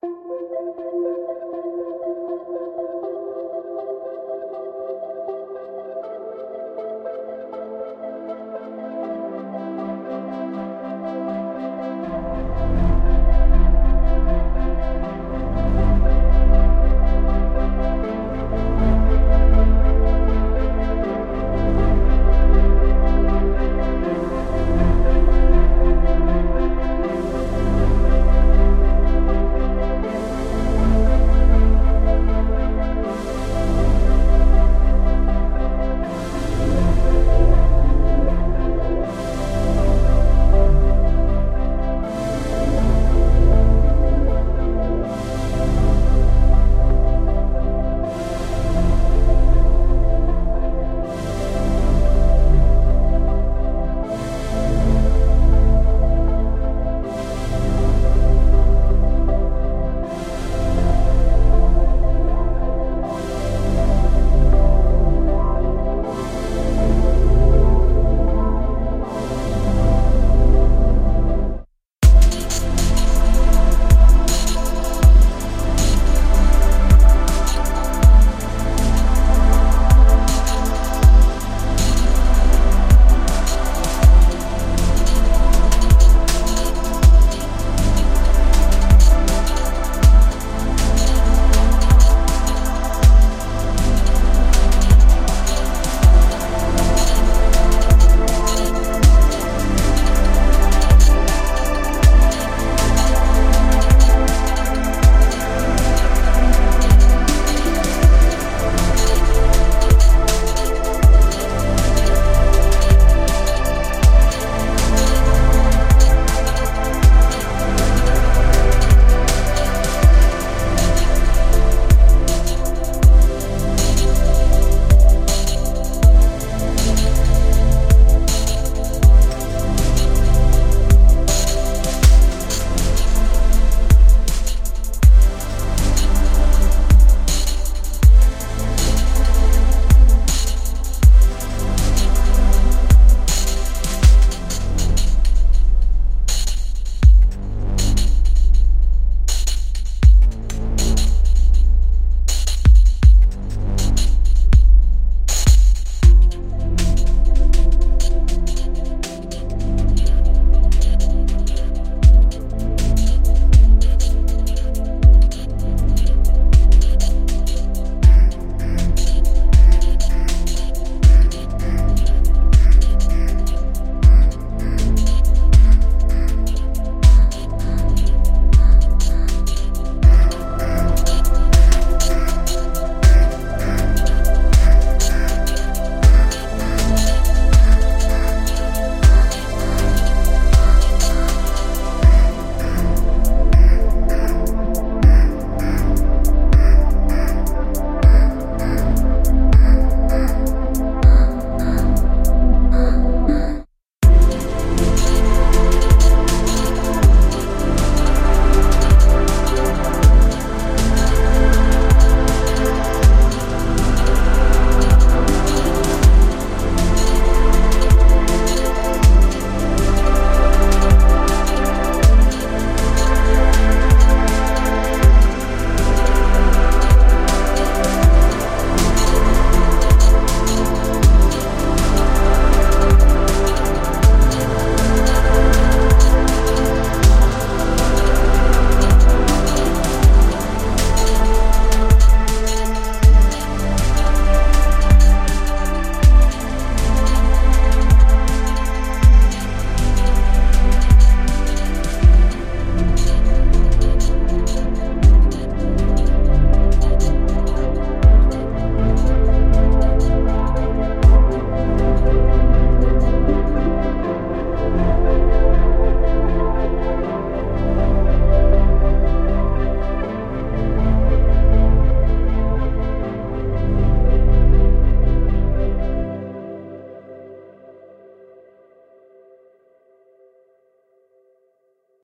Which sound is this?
Music for games, movies, or just listen to!
Enjoy and follow me!
Album: Awakening
Genre: Retrowave, Chillwave, Electronic.
Purpose: Concentration and meditation!
Description:
Awakening album - designed to help people who need high concentration.
Enjoy human!
The best regards,